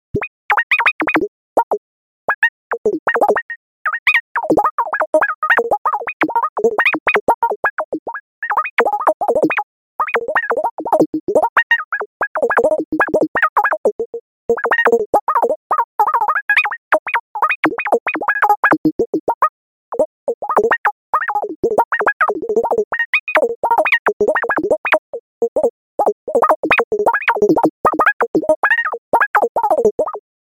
Arpio5 Synth Arpeggiator